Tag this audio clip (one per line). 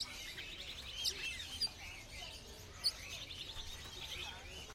smc2009
birds
tropical
field-recording
city
morning
athmosphere
porto
voices
birdmarket